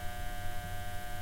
light hum
Short sample of an overhead fluorescent tube light in my basement with some low white noise. Loops seamlessly. Recorded with a Roland Edirol R-09HR and edited in Audacity.
bulb, fluorescent, light, rasp, tube, tubes